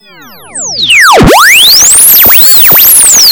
Radio scan
Ideal for making house music
Created with audacity and a bunch of plugins
acid, fx, house, ping, quality